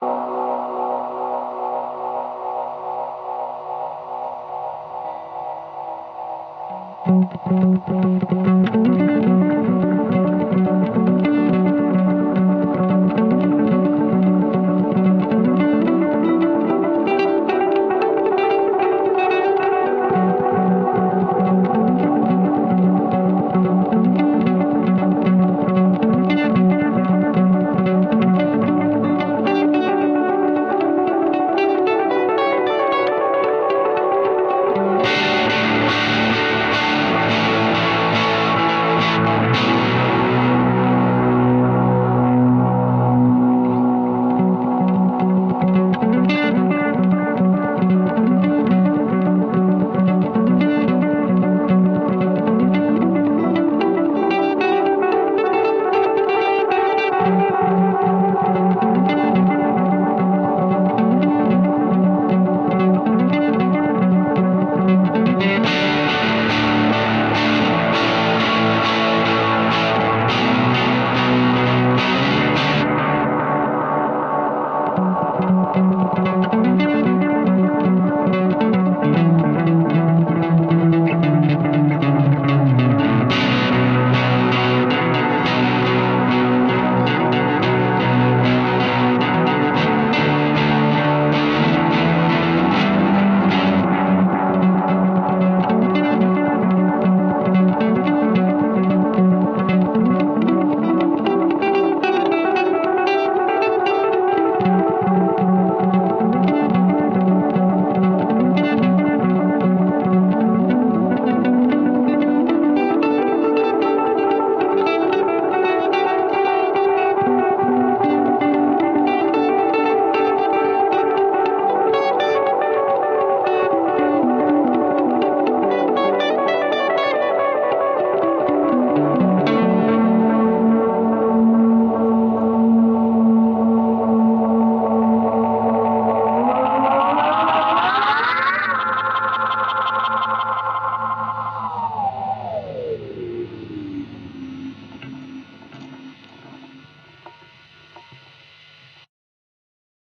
Ділея багато не буває мp3
This is experimental electric-guitar song, with using guitar pedals: delay (to much delay)and overdrive. Made record through guitar rig plugin in Cubase
Echo, Electric, Reverb, atmospheric, chords, cinematic, delay, distorted, distortion, experimental, fuzz, gloomy, guitar, melodic, noise, open-chords, overdrive, power-chord, psychedelic, rock, solo